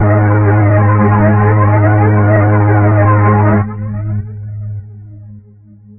THE REAL VIRUS 12 - FUZZBAZZPHLANGE -G#2
This is a fuzzy bass sound with some flanging. All done on my Virus TI. Sequencing done within Cubase 5, audio editing within Wavelab 6.
lead, flange, multisample, bass